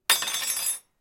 Spoon clattering (3)
A metal spoon being dropped onto my desk. Recorded from about 30cm away. It clatters and vibrates for a bit.
hit, clatter, fall, silverware, spoon